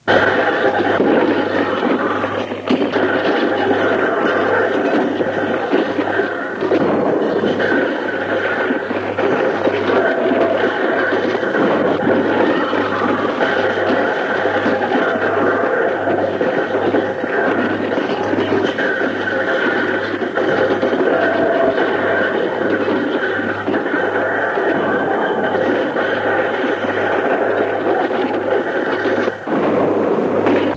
Breaking done by mixing breaking done with box containing parts of radios or other electronics. Transferred from c-cassette. Date: autumn 1985 place: Finland - Laukaa edited slightly with audacity
breaking, old, mix, c-cassette